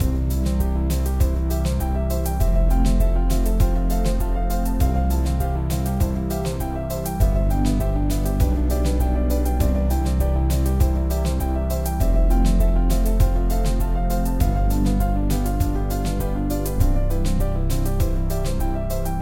An African style beat made in Ableton using a delayed piano, pads and drums.
100bpm, beat, drums, piano, rhytmic, sequence